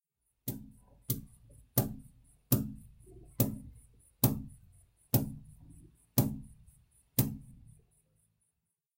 34.5 repetitive basic sound (useful for hits)
made with a plushie on a table